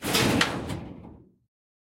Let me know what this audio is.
small-metal-scrape-02
Metal rumbles, hits, and scraping sounds. Original sound was a shed door - all pieces of this pack were extracted from sound 264889 by EpicWizard.
bell, hammer, hit, nails, rumble, metallic, scrape, shield, blacksmith, rod, pipe, industry, lock, percussion, factory, steel, clang, impact, shiny, metal, industrial, ting, iron